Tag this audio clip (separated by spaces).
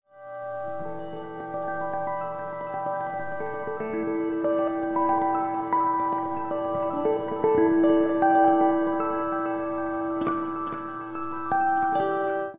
electric harmonics guitar